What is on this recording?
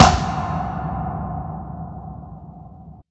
A processed sound of my house, sounds like a snare, but is only a item crashed onto the ground.
drum, snare